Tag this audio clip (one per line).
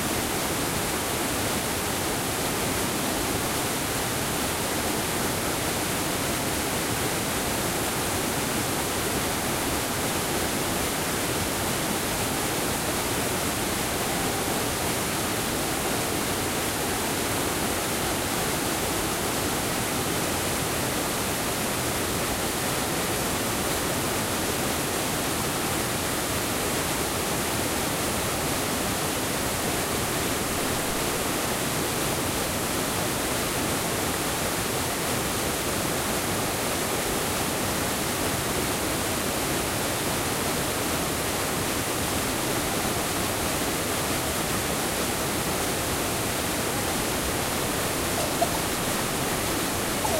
field-recording
flowing
nature
rushing
waterfall